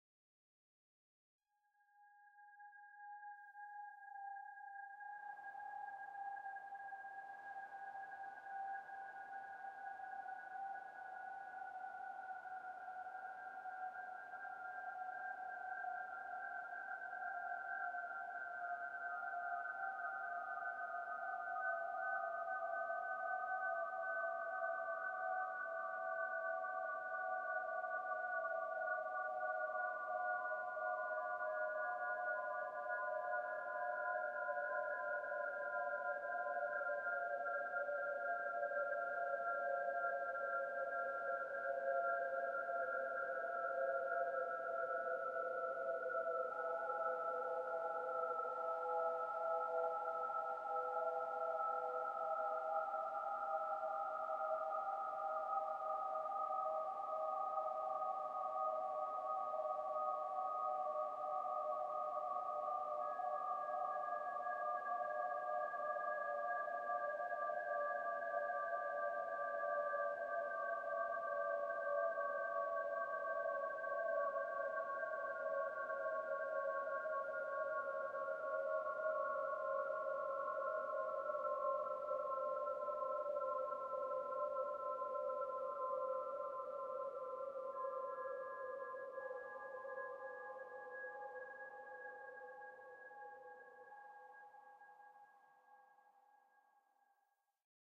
ioscbank and reson exp
In Max/msp there is a very nice and simple oscillator bank. This sound results from such an oscillator bank (and just a little bit of reson~-filtered noise) which had a negative phasor as frequency inlet (thus having this falling pitch).
atmosphere, atmospheric, clustering, dark, drone, falling-pitch, high, oscillator-bank